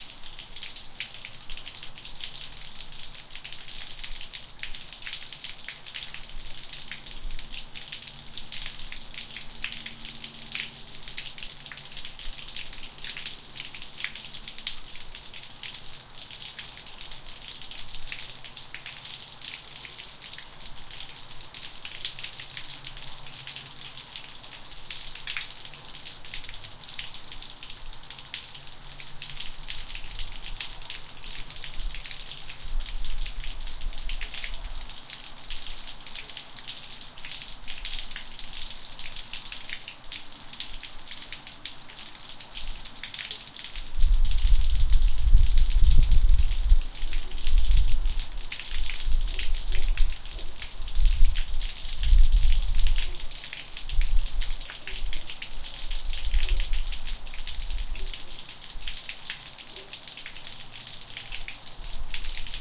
Water Falling into Gutter 2
Recorded with Zoom H6 in a suburban neighborhood.